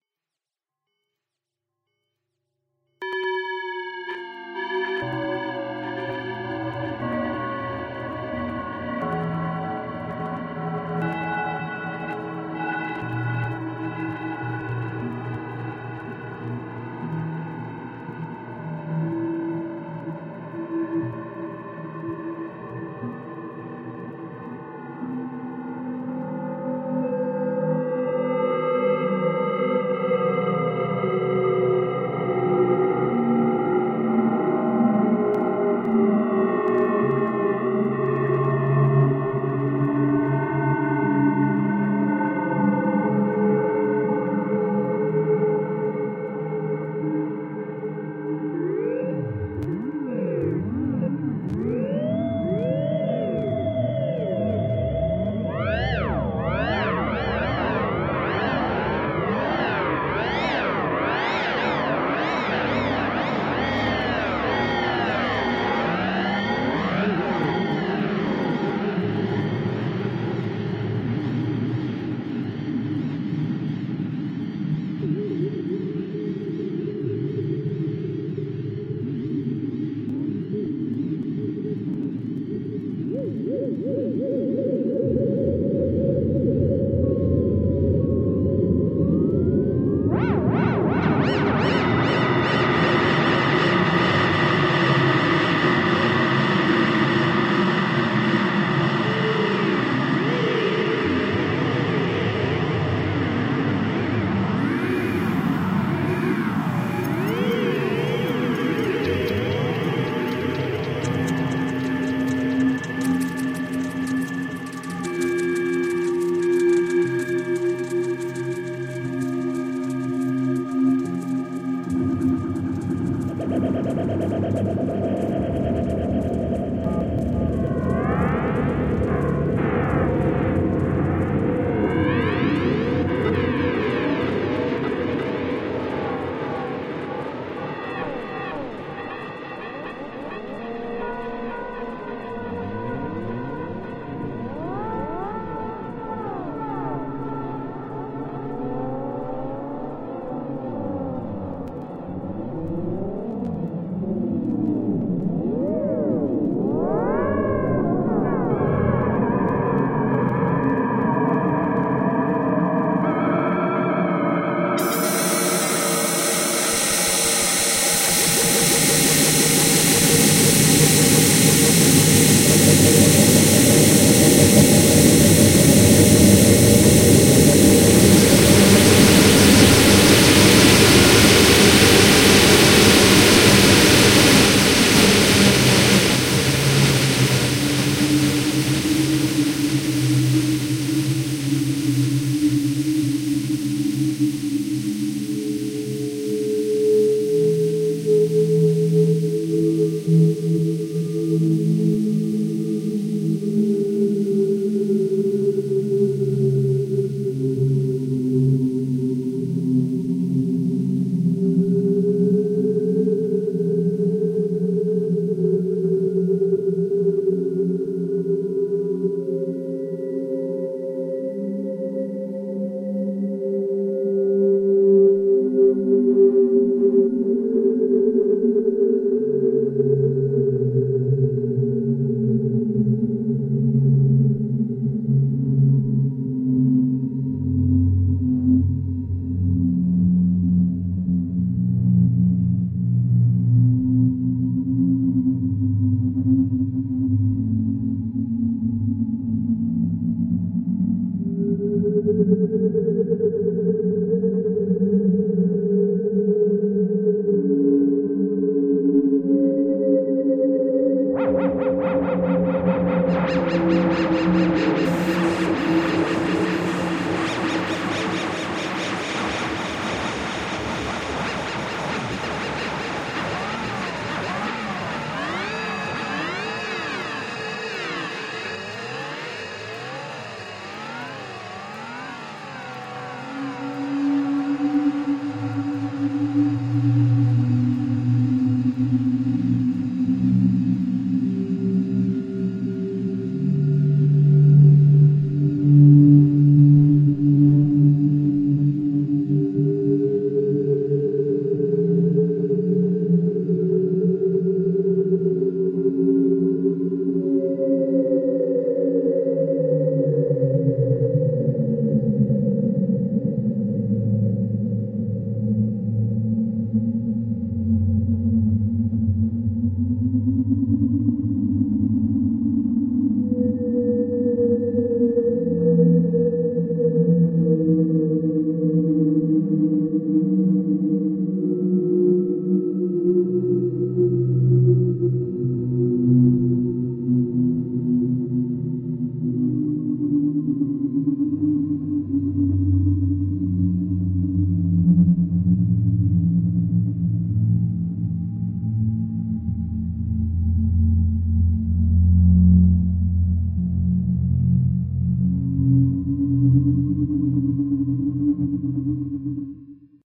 That One Night On Hoth
A loop created by using free VST instruments and analog delays, great for ambient scapes.
Creepy, Loop, Ambience, Atmosphere, Ambient, StarWars, Horror, Amb